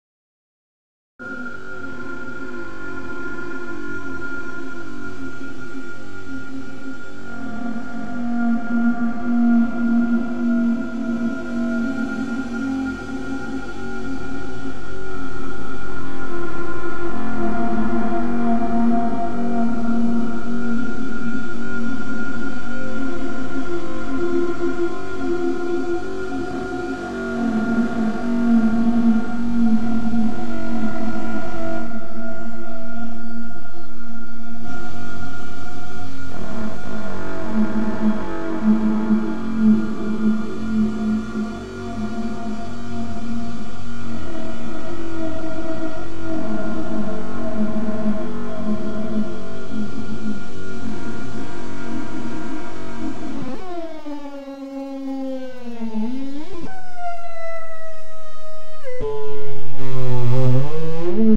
Made in Reaktor 5.
idm, atmospheric, noise